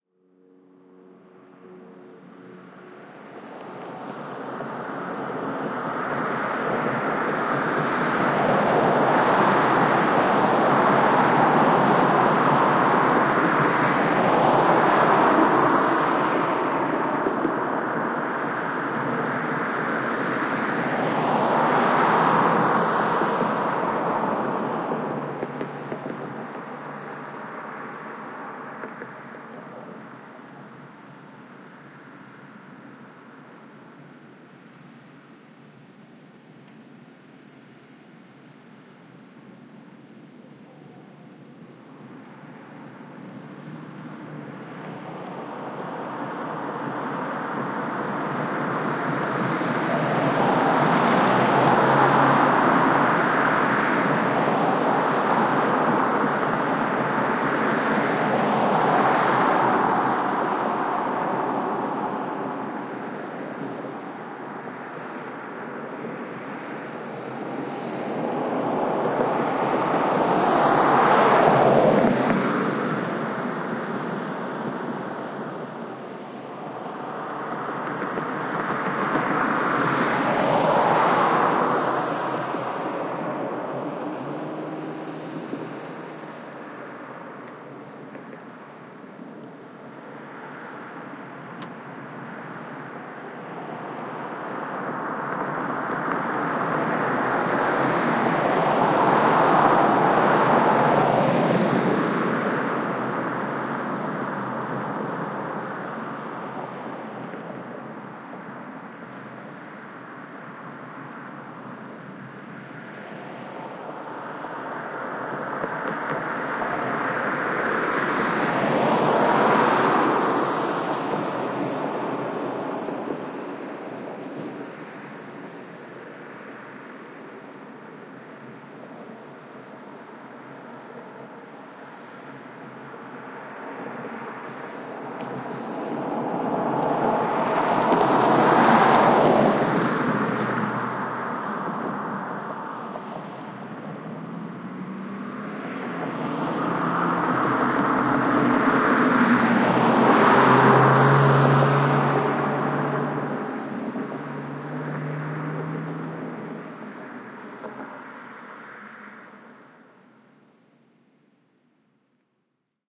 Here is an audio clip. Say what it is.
VHCL S LA Traffic Canyon Road 001
I stopped and recorded Los Angeles canyon traffic on my way to work. Nice selection of engines, cars, etc. Was on a hill, so engines pull harder going one way than the other.
Recorded with: Sound Devices 702t, Beyer Dynamic MC930 mics